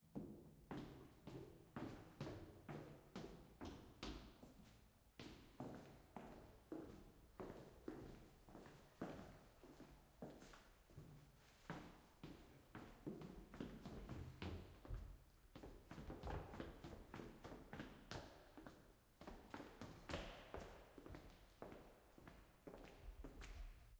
Leather boots walking down NYC staircase
Boots, Footsteps, Marble, Staircase, Stairs